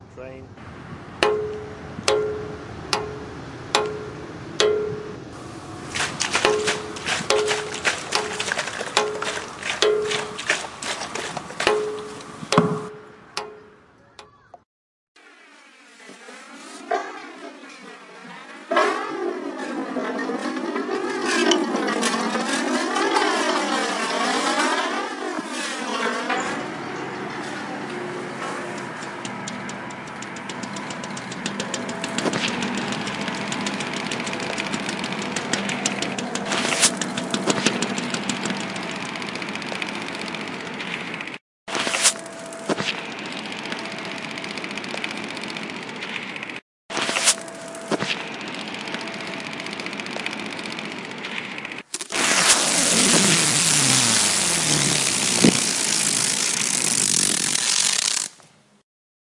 To the movies
Belgium students from Sint-Laurens school in Sint-Kruis-Winkel used mySounds from Swiss and Greek students at the Gems World Academy-Etoy Switzerland and the 49th primary school of Athens to create this composition.
Belgium, Febe, Imani, Paulien, Sint-Laurens, Soundscape